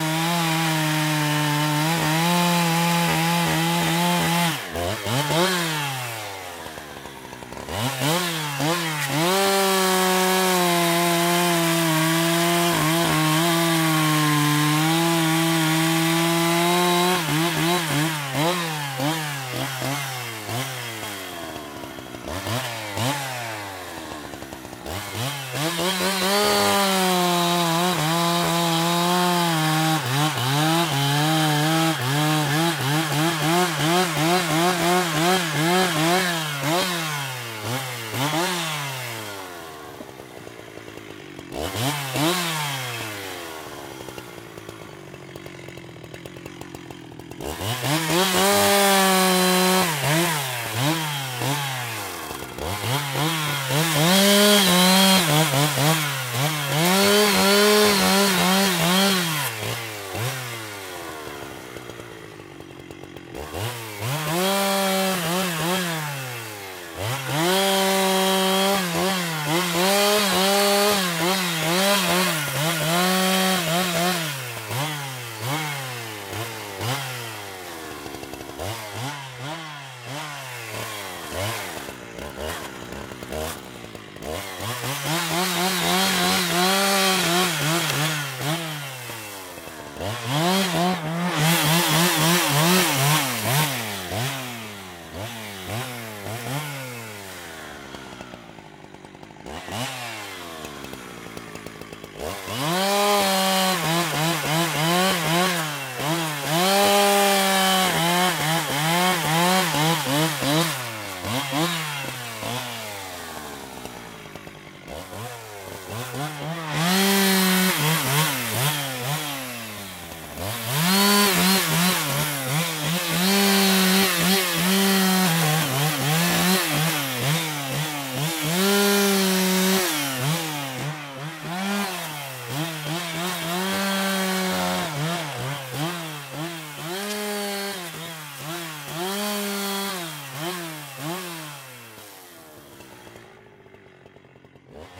ChainsawCutting Close 4824
Field recording. Woodlogger working with a Husqvarna chainsaw. Close distance.
Recorded with a Zoom F8 and a Sennheiser MKH8060.
Thanks to my neighbour Zé who was very kind to let me record him working.
chainsaw, chop, cortar, cutting, engine, field-recording, husqvarna, logs, madeira, madeireiro, motor, motoserra, serrar, sound-location, start, stop, wood